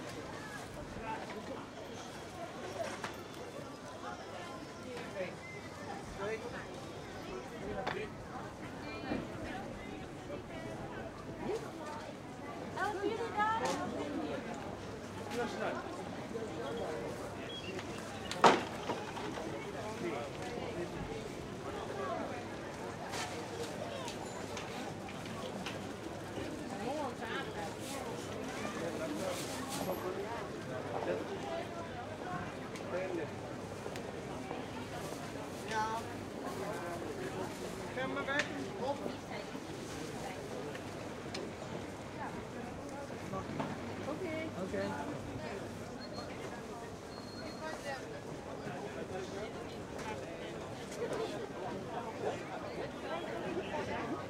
General noise ext.
design, ambient, sound, Market